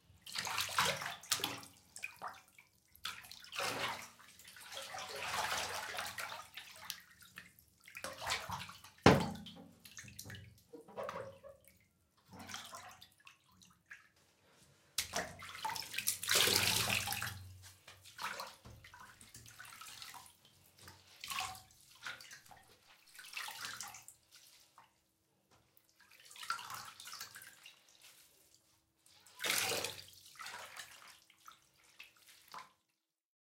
filling the bath with the shower head. double mono. recorded on MD with rode nt3.